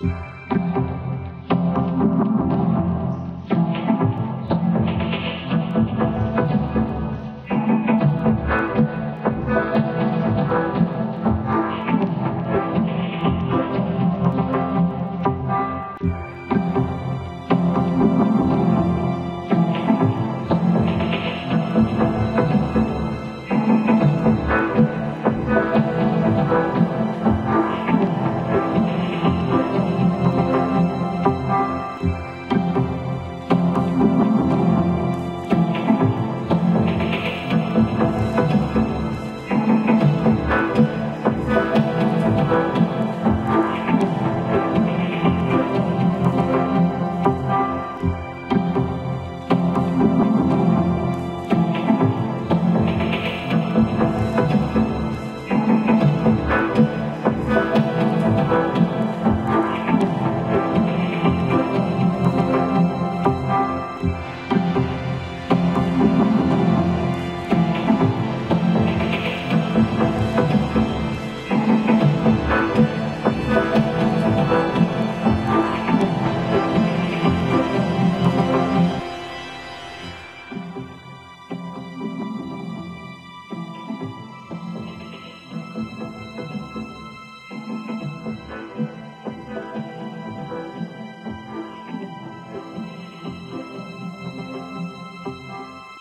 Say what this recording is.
Drum Vocoder Sample Soundscape Background